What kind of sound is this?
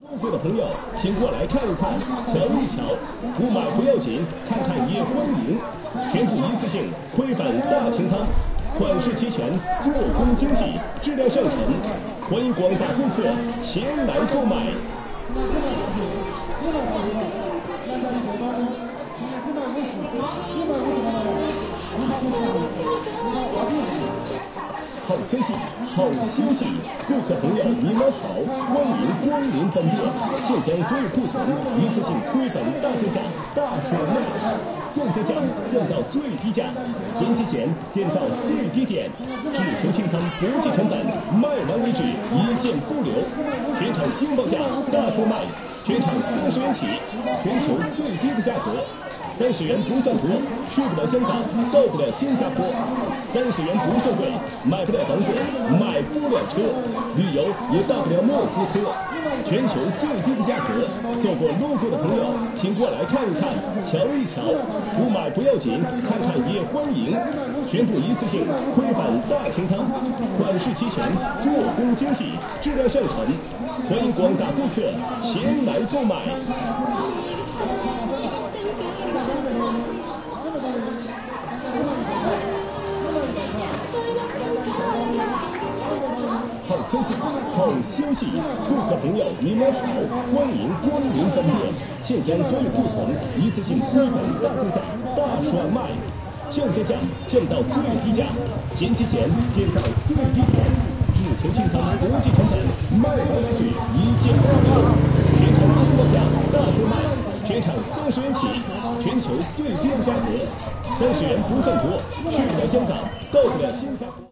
Mixture of megaphone loop sound in chinese street (Songpan)